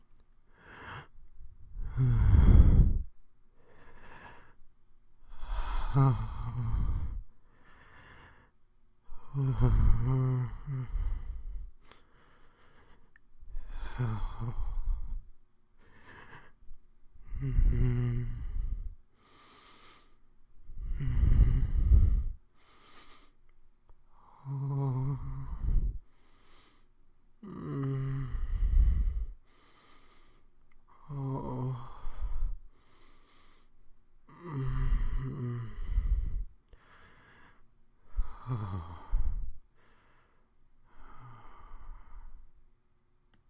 Sensual Breathing
I recorded myself breathing in a... almost sensual way, if you were thinking that. Enjoy! Recorded in Audacity using a Blue Snowball ICE microphone.